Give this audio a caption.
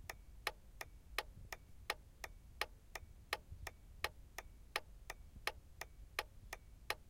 trafficator cabin
The sound of a relay operating the pulsed direction signals in a VW Passat. Intended to be used as part of a larger soundfield, there is a background noise from the amplifier at higher volumes.